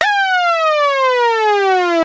sfx-throw-1
Made with a KORG minilogue
effect, game